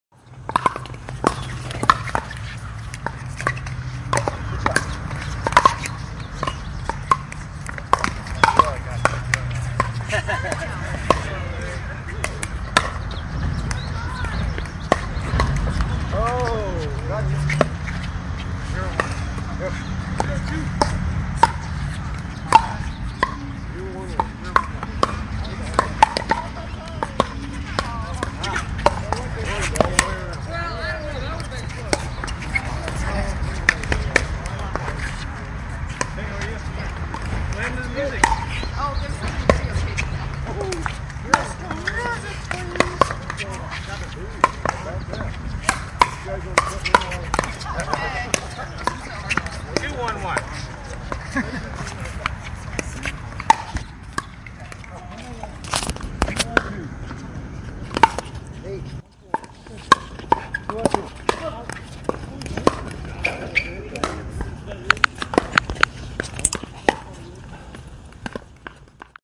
Pickleball Lincoln Nebraska
The sounds of Pickleball being played 7/23/2019 in Lincoln, Nebraska. Pickleball is a paddleball sport that combines elements of tennis, badminton, and table tennis. Two or four players use solid paddles made of wood or composite materials to hit a perforated polymer ball, similar to a Wiffle Ball, over a net.